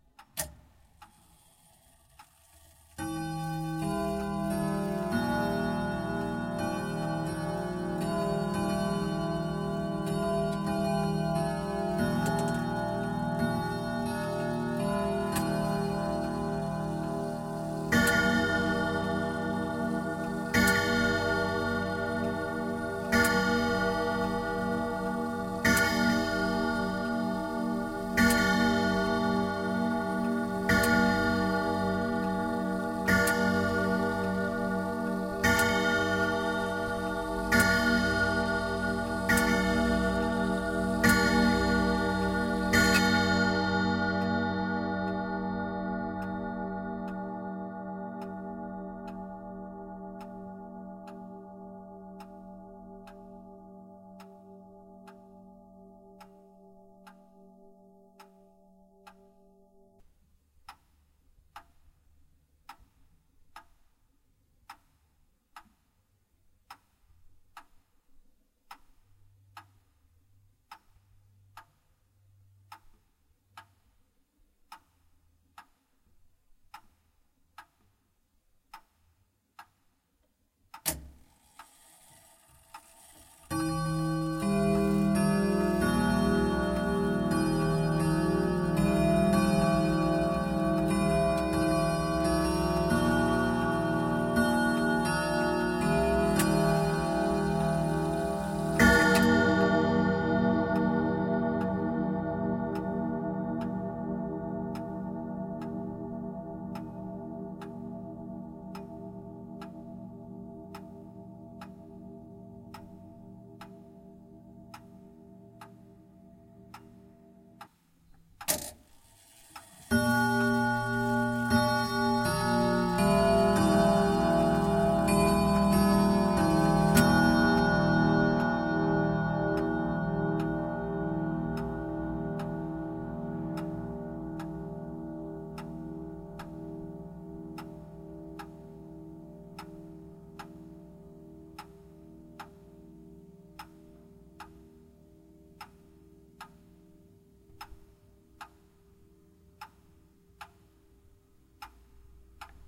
grandfather's house clock
grandfather clock chimes in Bucelas, small village in Portugal, december 2020.
Clock, house, old, tic-tac